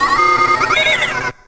BentPhoneFX39 IBSP1
This samplepack contains 123 samples recorded from a Cicuit Bent Turkish Toyphone.
It has three subfolders containing a) sounds from the Toyphone before bending, (including the numbers from 0-9 in Turkish), b) unprocessed Circuit Bent sounds and c) a selection of sounds created with the Toyphone and a Kaoss Pad quad.
toy, bend, glitch, bending, phone, circuit